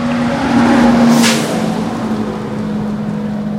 Bus performs a passby, sound of engine and 'hiss'.